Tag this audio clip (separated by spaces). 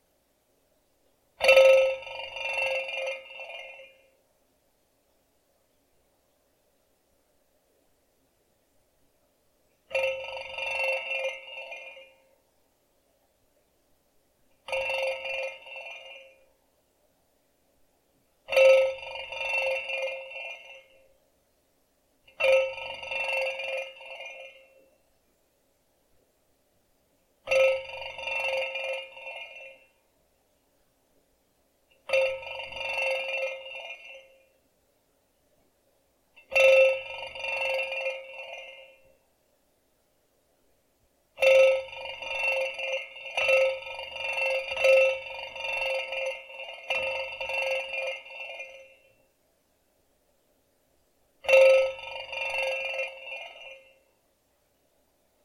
Contraption Recording Spring